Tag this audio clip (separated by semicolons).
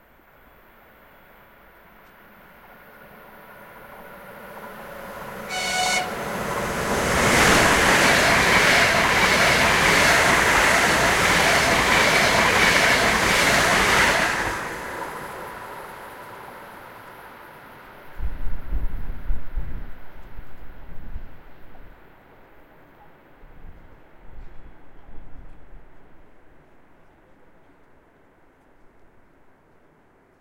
by; doppler; express-train; high-speed; Moscow; pass; passenger-train; passing; rail; rail-road; Russia; Saint-Petersburg; Sapsan; train